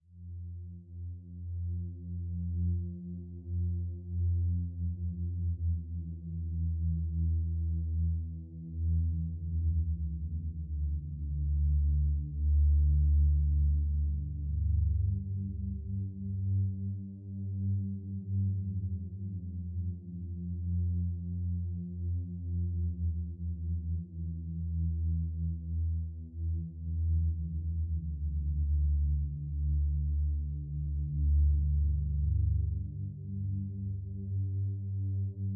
Distant zebra A
loop, zebra, ableton